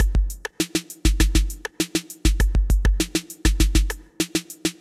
100bpm 2 measures 3
A 100 BPM, 2 measure electronic drum beat done with the Native Instruments Battery plugin
100BPM, drumbeat